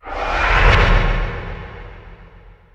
Percussion sound with reverb effects processed with cool edit 96.
drum, percussion, reverse, free, sample